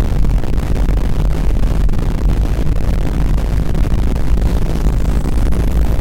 earth on fire
crackling, sound